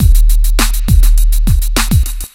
Stezzer 102 break
This is a boomy break beat I made at 102bpm. It utilises a variety of individual free percussive hits, sequenced in free tracker program, Jeskola Buzz.
underground, industrial, breakbeat, hop, punchy, stezzer, trash, bpm, sub, bass, break, hip, drum, punch, buzz, jeskola, beat, 808, boom, box, kit, 102